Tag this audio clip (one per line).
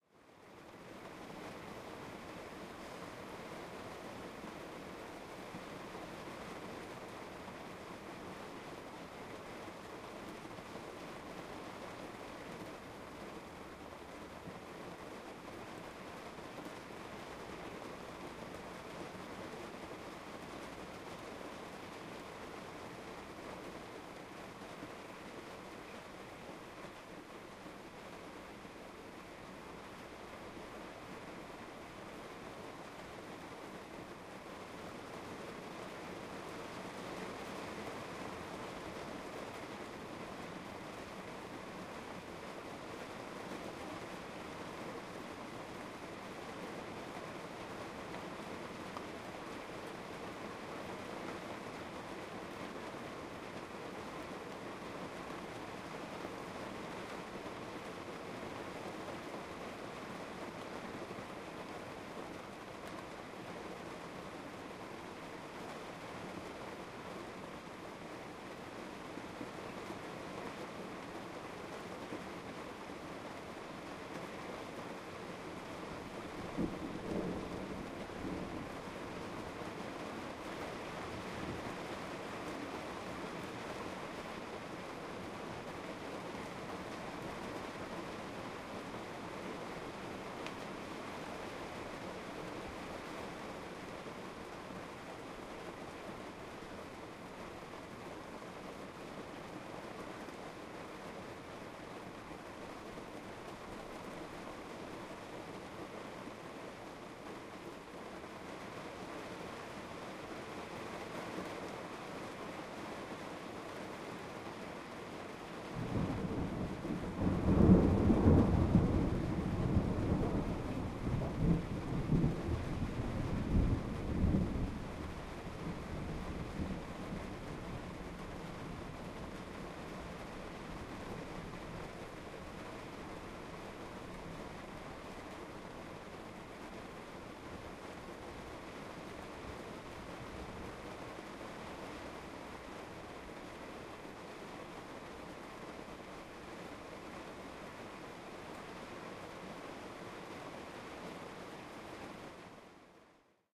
1979
trailer
airstream
ambient
aluminum
nature
thunder
thunder-storm
lightning
thunderstorm
storm
rain
spring
vintage
field-recording
weather
rainstorm